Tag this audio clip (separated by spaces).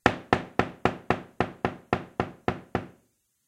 knock door wood hit plank